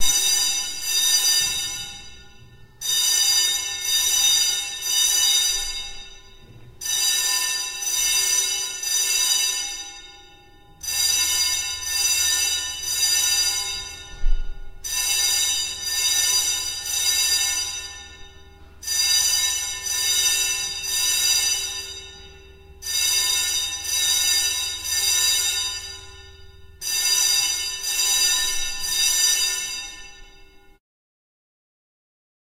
This is the fire alarm in my building. Yes, rather than evacuating I recorded the alarm.
alarm, emergency, building, apartment, fire